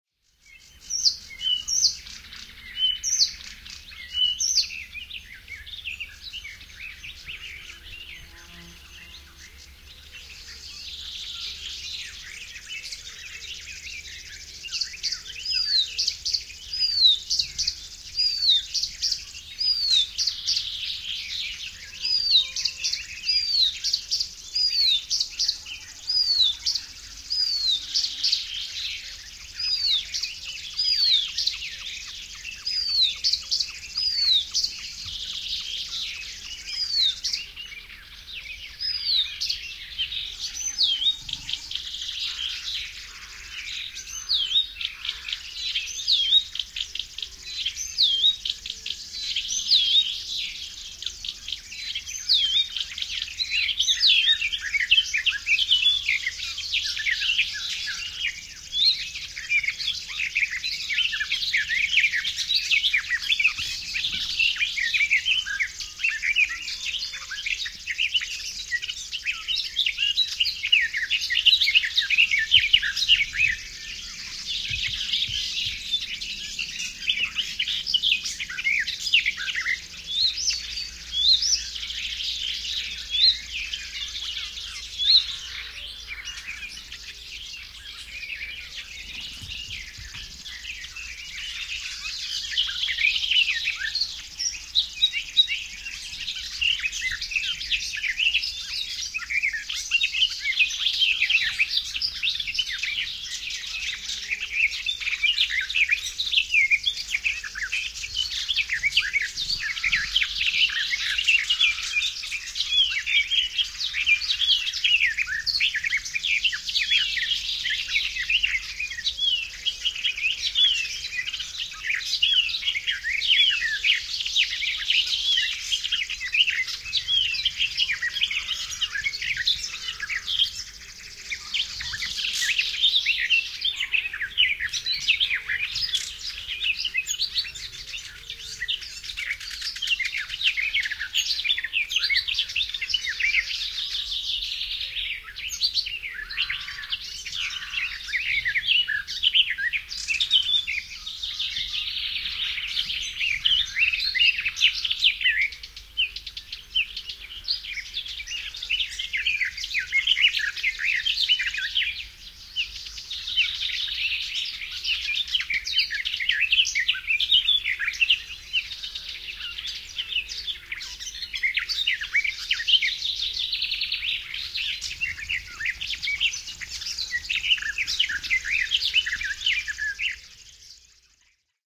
Linnunlaulu, lintuja lehdossa / Birdsong, birds singing lively in the grove in the summer, e.g. icterine warbler, garden warbler and grasshopper warbler
Linnut laulavat vilkkaasti lehdossa kesällä, mm. kultarinta, lehtokerttu ja pensassirkkalintu.
Paikka/Place: Suomi / Finland / Vihti, Jokikunta
Aika/Date: 06.06.2002